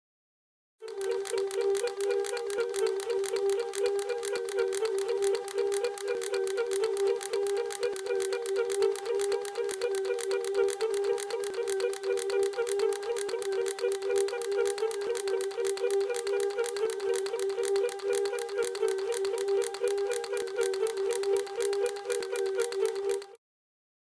5 hologram game
Hologram game for kids
hologram, s, sfx, future, science-fiction, game, sound